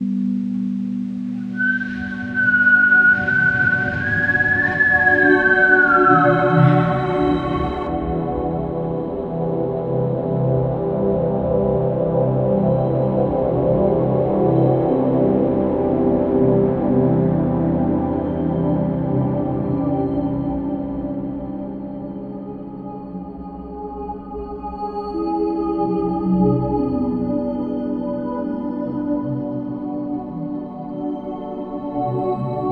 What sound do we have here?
This is a sound I created in Garage Band using a whistled tune and some synth sounds. Enjoy!